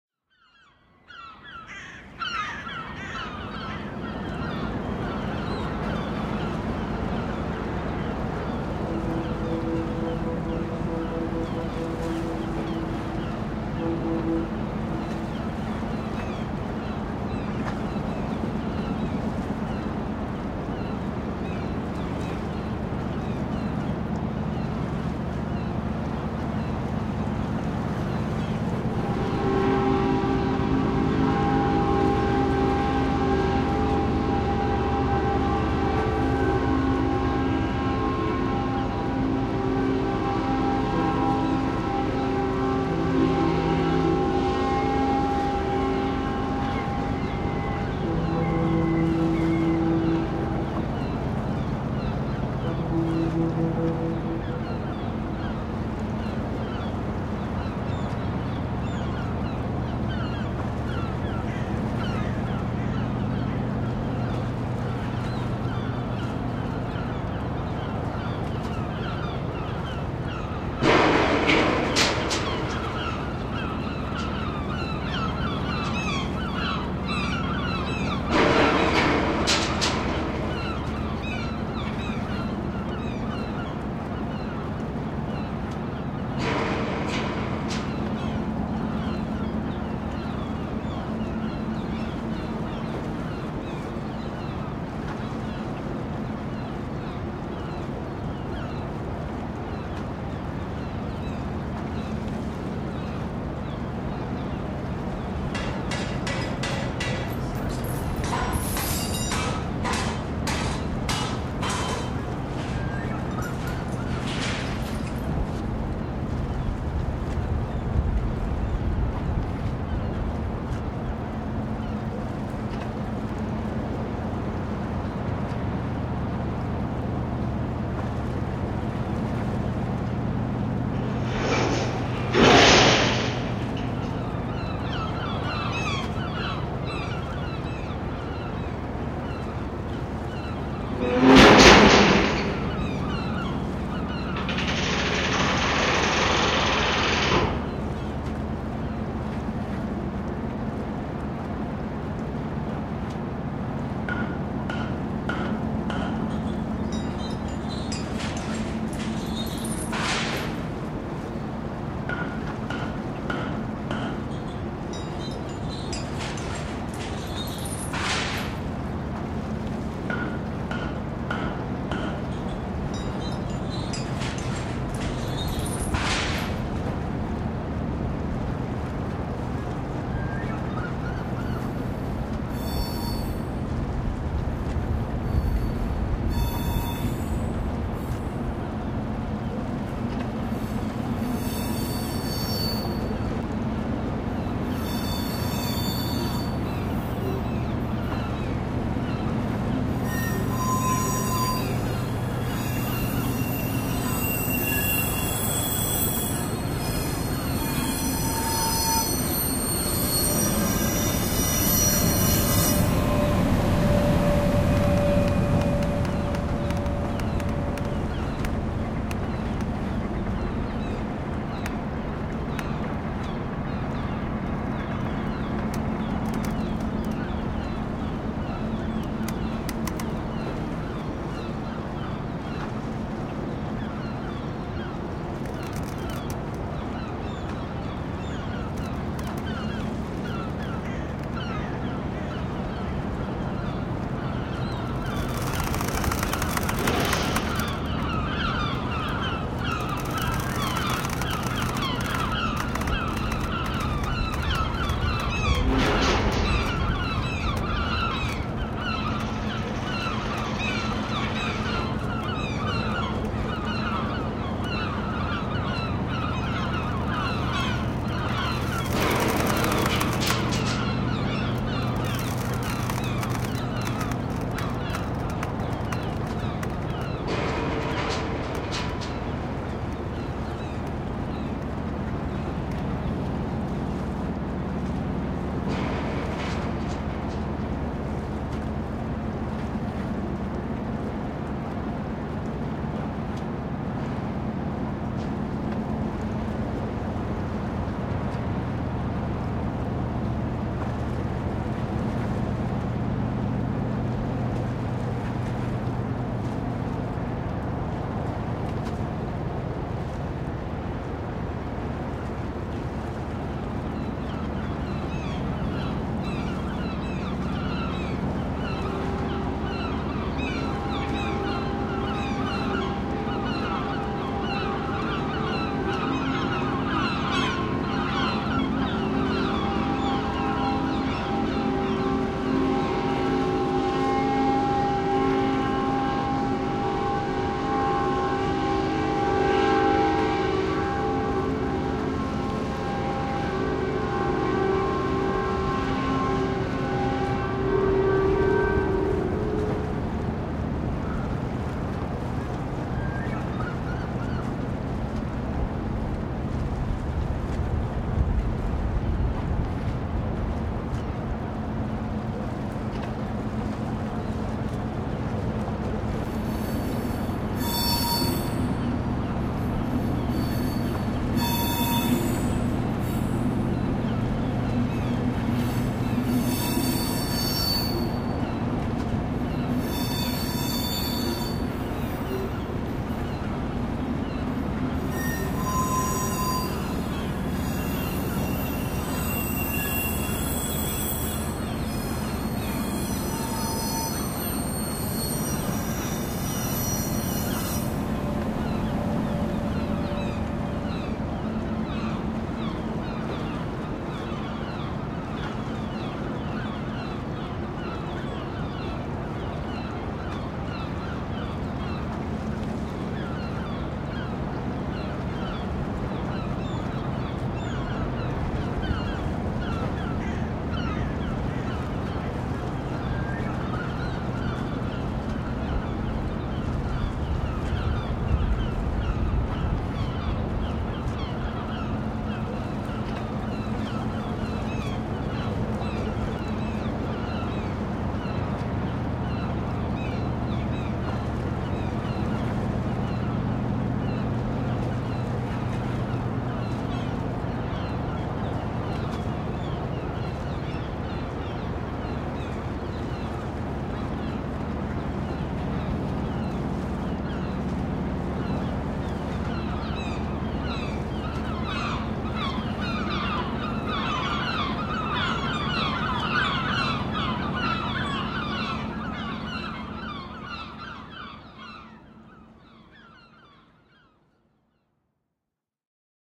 Dockside Soudscape
This is a soundscape I made for a large scale art and oral history event for Light Night in Liverpool.
It was designed to create the texture of a working Docks in Liverpool in the 50's & 60's.
Some of my sounds from a Tascam DR-07 MKII.
Plus
Props to these sounds to adding to the mix.
98479__juskiddink__flock-of-seagulls
72805__lg__steam-whistle-090518
106111__thatjeffcarter__clanking-warehouse-combined-stereo
145721__rmutt__mooring-rope
171376__klankbeeld__container-port-01
213600__genghis-attenborough__train
222037__sailor55__marinepiledriver-sel
244233__ikbenraar__car-ferry-terminal-dover
Cheers
Gav
Working-Harbour, Ship-Sounds, Docks